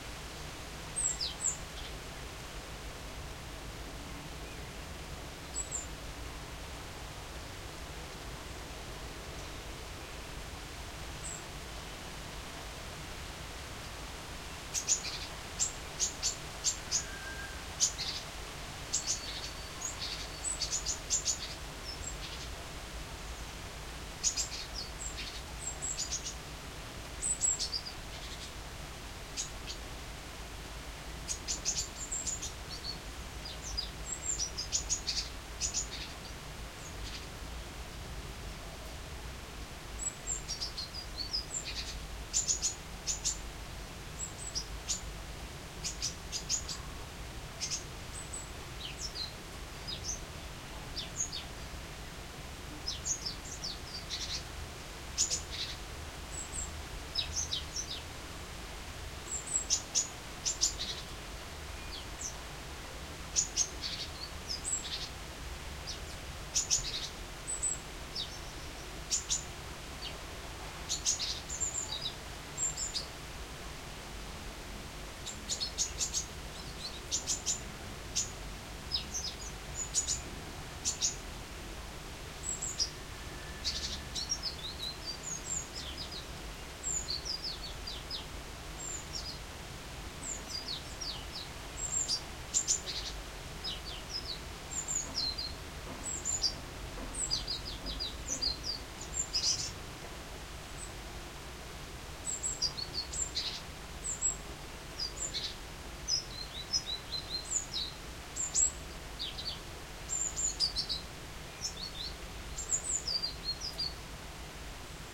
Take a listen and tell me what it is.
A young great tit (parus major) practising a song. It found shelter for the rain on my balcony where I placed an Edirol cs-15 mic plugged into an Edirol R09 to record the thunderclaps in the thunderstorm that passed my house at the same time.
birdsong, rain, nature, field-recording, bird